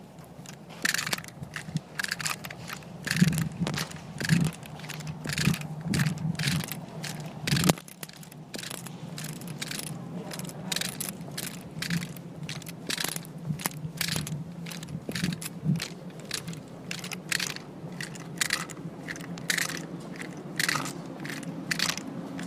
the sound of a co-workers tool belt walking through the hall
footsteps, tool-belt, walking